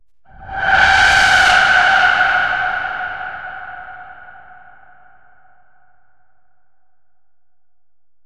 BRAAM-HIGH-2
Entirely made with a synth and post-processing fx.